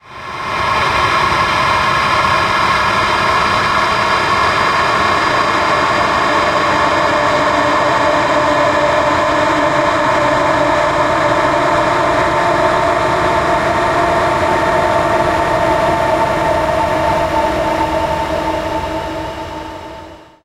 Dark Wind 3
a sound to give a sense of a chill.